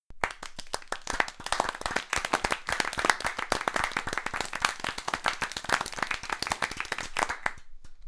Simply recorded myself from different directions and distance to emulate a small group